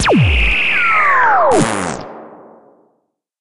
FX failed spell
The sound of a magic spell failing.